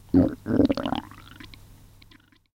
Using an AKG C1000s I recorded my ex's stomach after she'd taken some prescription pills and they'd started making noises in her stomach! Bit weird, but maybe it's just what someone's looking for!